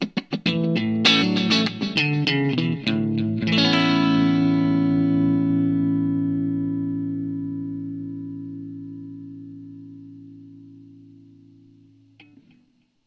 Electric guitar riff jingle
Short electric guitar riff.
Audacity, AKG P 220, ALESIS iO4, ordinary room space.
electric, guitar, riff, jingle